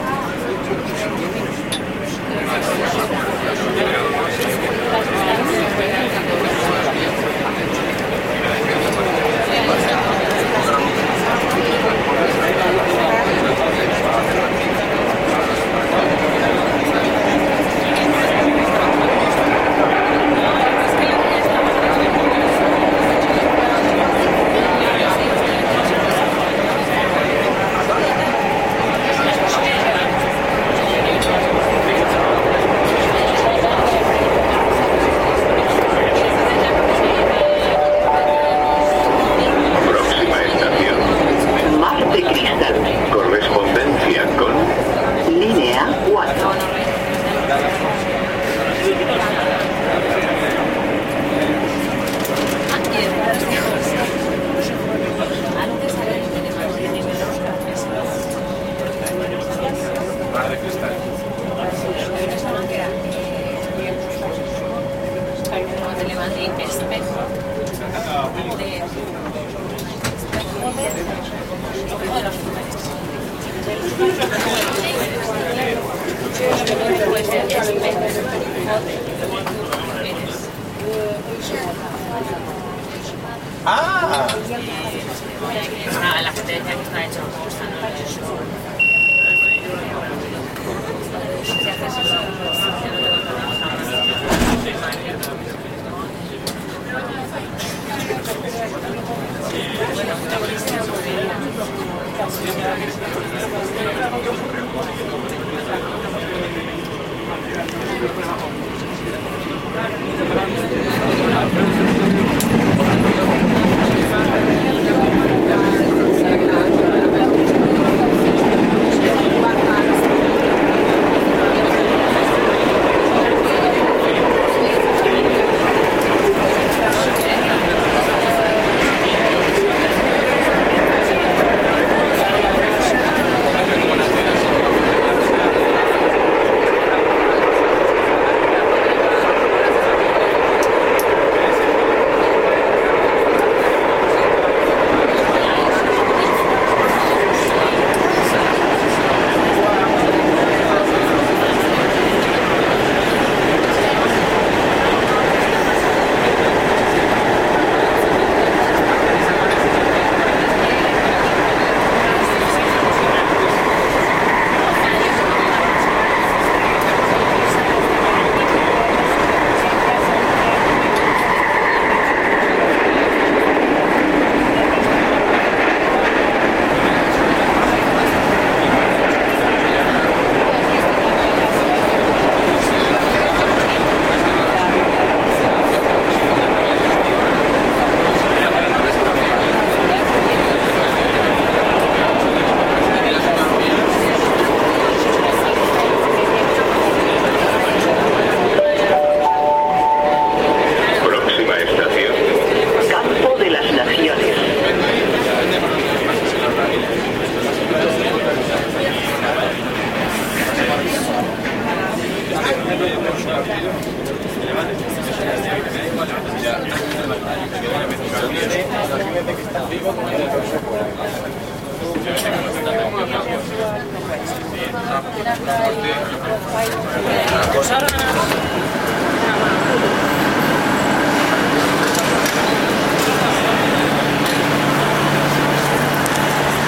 Inside the Madrid subway (Metro).
Very crowded, a couple of stations travelled.
You can hear the recorded announcement over the PA naming the Stations.
Next Stop: Mar De Cristal. Next Stop: Campo De Las Naciones.
(Próxima estación...)
announcement, crowded, madrid, people, spanish, subway, transportation